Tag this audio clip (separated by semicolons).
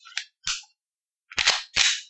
reloading; shot; glock; loading; bang; gun; shooting